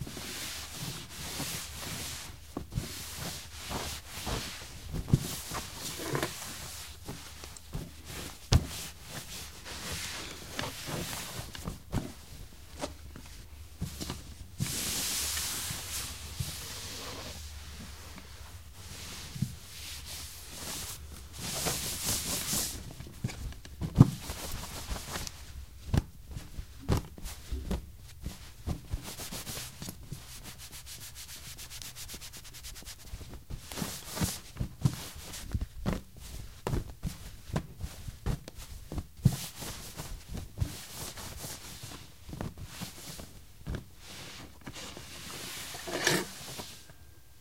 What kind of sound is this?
Indoor Towel Dry Wooden Table
Soft hand towel rubbing dry a wooden table.
sound, kitchen, table, dry, effect, sfx, indoor, sounddesign, towel, foley, wood